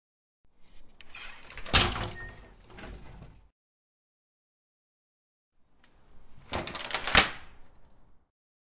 Sound of household chores.
chores, CZ, Czech, household, Pansk, Panska